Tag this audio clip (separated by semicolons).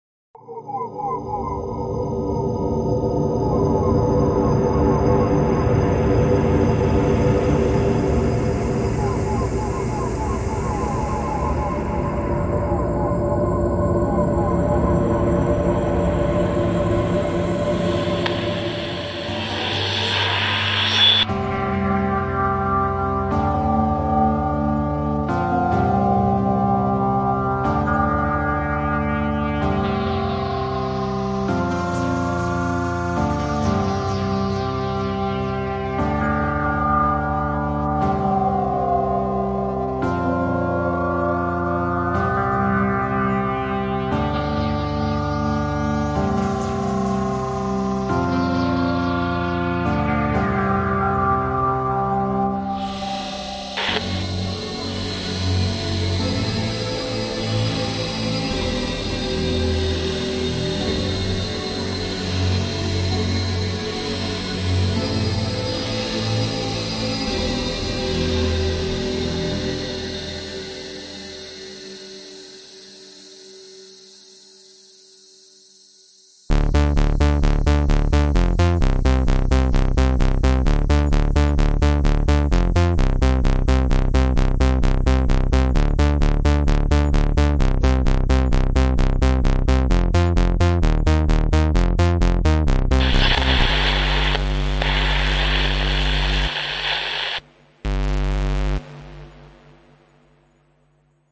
star; future; SUN; wave; sounds; space; radio